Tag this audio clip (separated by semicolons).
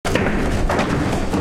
design; sound; industrial